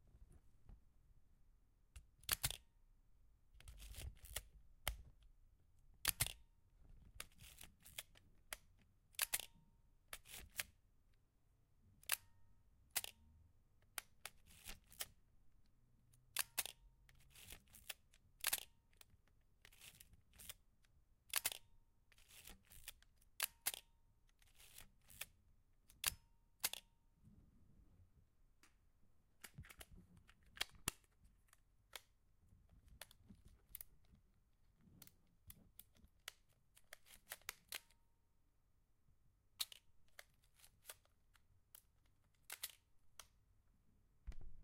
Analog SLR camera shutter
Several shutter sounds with several shutter intervals.
analog, pentax-mv1, shutter, single-lens-reflex, SLR